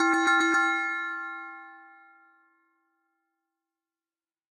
Made in FL Studio. I use this to signal a level ending.